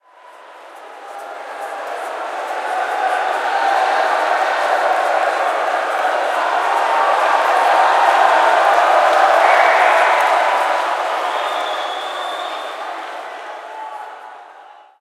Crowd Cheering - Soft Cheering 2
A sound of a cheering crowd, recorded with a Zoom H5.
event, people, concert, sports, loud